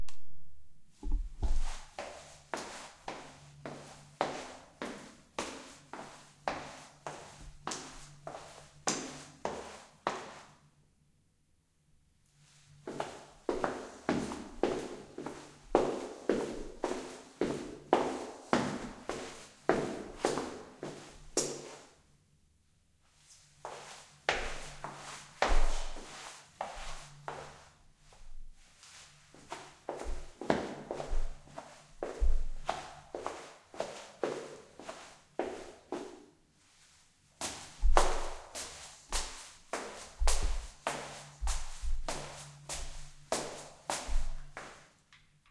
steps staircase
Walking up and down the stairs of an apartment building, recorded with a pair of AKG C391B's.
apartment, building, feet, footsteps, reverberant, staircase, stairs, stairway, steps, tiles, walk